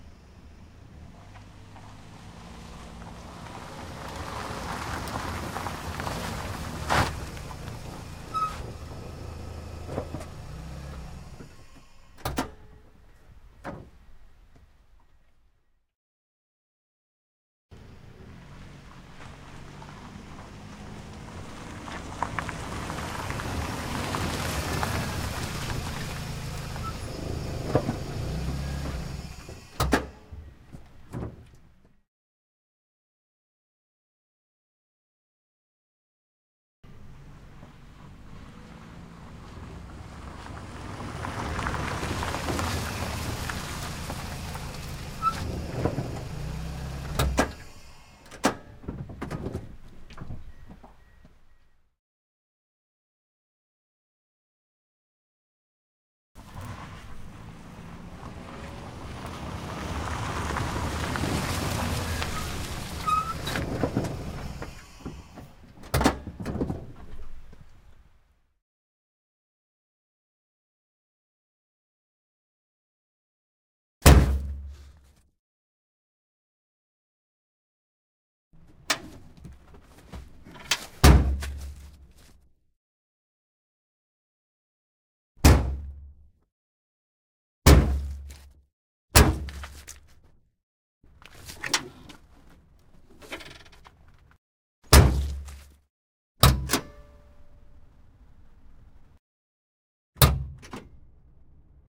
auto car pull up on gravel, door open close, trunk open close various
auto, car, pull, trunk